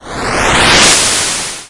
Fading PitchUp Sweep1
8-bit, arcade, chip, chippy, chiptune, decimated, lo-fi, noise, retro, sweep, vgm, video-game